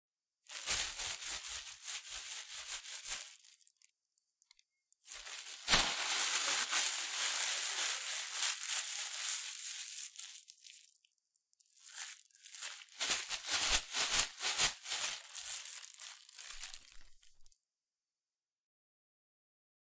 Plastic Bag: Rubbing and flailing a bag.